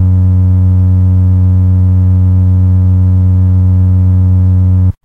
I recorded this Ace tone Organ Basspedal with a mono mic very close to the speaker in 16bit